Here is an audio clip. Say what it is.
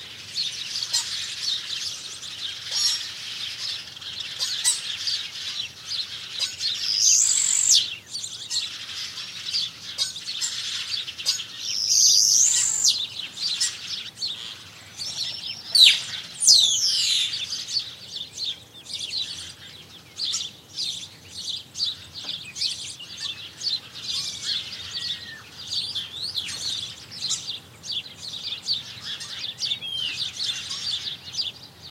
20070228.starlings.sparrows
Starling calls and Common Sparrow chirps